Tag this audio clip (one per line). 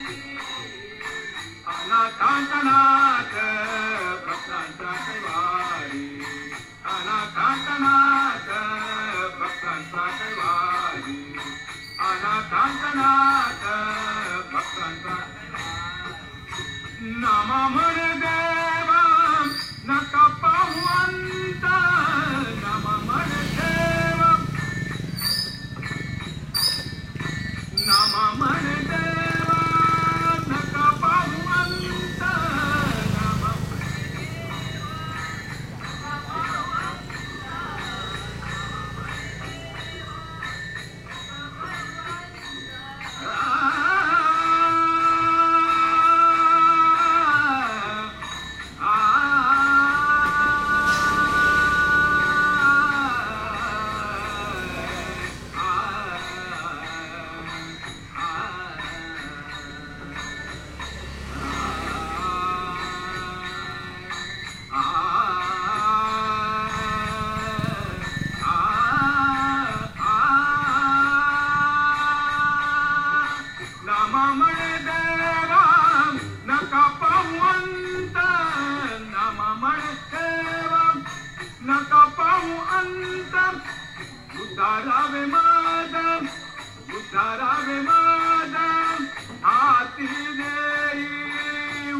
india
Goa
religious
chant
mantras
sining
prayer
chants
North
temple